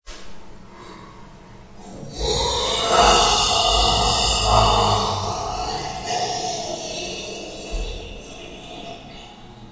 Ghastly Groan
I turned a corny "grr" into this.